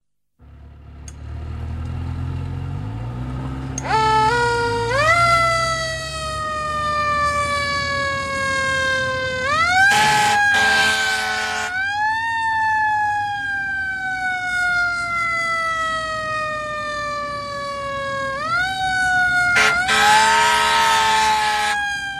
Fire Truck w-Siren & Air Horn
sirens, horn, fire, emergency, firetruck, fire-truck, police, 911, ambulance, alarm, siren